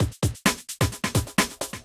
A dnb beat for any Dnb production, use with the others in my "Misc Beat Pack" to create a speed up. To do this arrange them in order in your DAW, like this: 1,2,3,4,5,6,7,8, etc